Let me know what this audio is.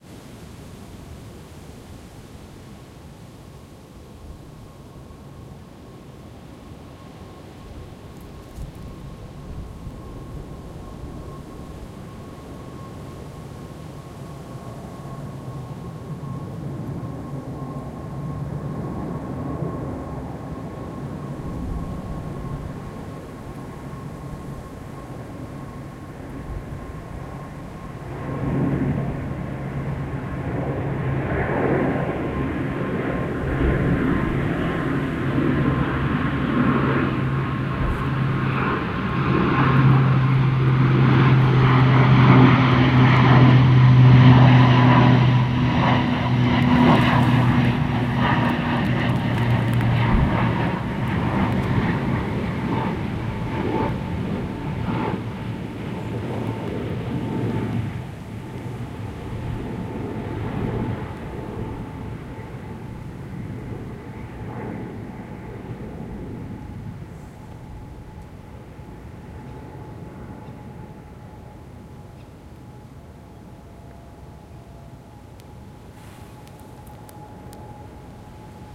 ATR 72 (AT72) plane flyby at 300 m altitude landing towards airport
Airplane, ATR 72 (AT72) flying by towards airfield at 300 m altitude.
ATR 72 is a plane much like the DH8D, a twin engined propeller plane.
Recorded at a quiet location (maybe only some birds).
Observing point: 300 m from the side of the flying route (one has to look up about 45 degrees for the plane).
Speed: about 250 to 280 km/h.
This is the recording as it is, recorded with Zoom H2N music-capable voicerecorder. The mode of the voicerecorder was just the good old ordinary left-right stereo recording (XY) not such things as mid-side or 4 channel etc.
No compression used.
I may have edited out some sudden bird sounds or beeps from the camera but that's of negigible influence on the sound. There is a slight hiss from a row of trees in the distance.
If you're happy with the recording, it only adds to the fun I already had of it.